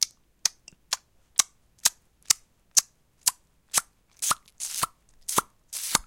sharp "tic" rhythm